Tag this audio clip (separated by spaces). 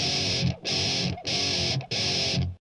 groove guitar metal rock thrash